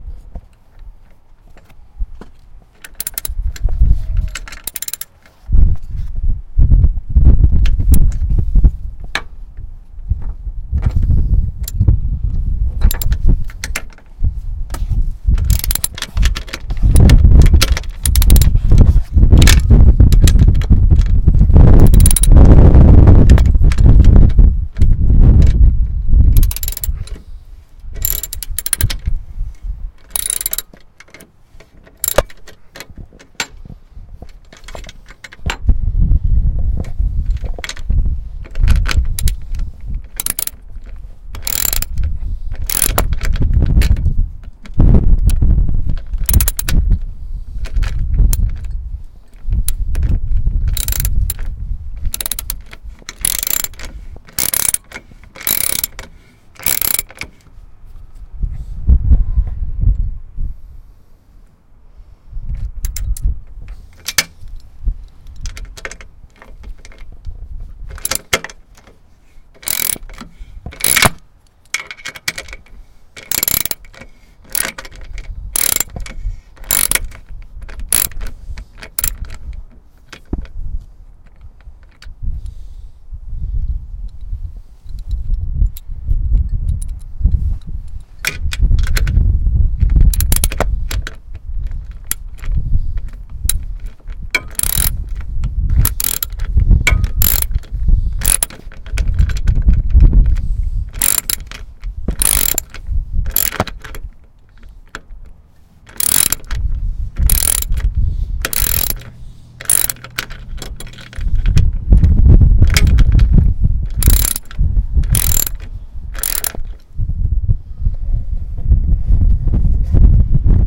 A rachet on a bolt on a car engine. Outdoors. Recorded on Zoom H2